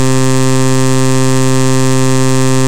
The same pulse as rancidpulse(2), with less sustain.
extraneous, pulse, rancid, strange, synth, weird